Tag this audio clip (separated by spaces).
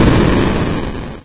8-bit 8bit arcade chip chippy game labchirp lo-fi retro vgm video-game videogame